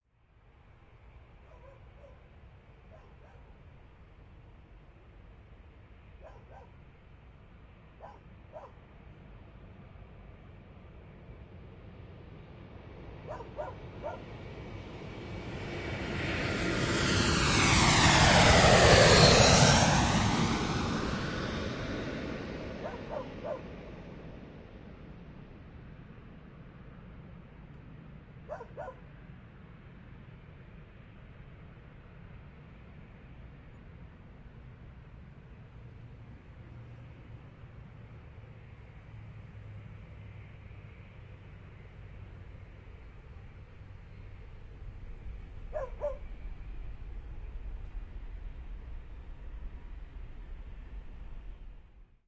airplane and dog
an aeroplane flyby shortly befor landing. a dog is barking in the background. recorded at airport Tegel in Berlin, Germany.
airplane, animal, dog, field-recording, traffic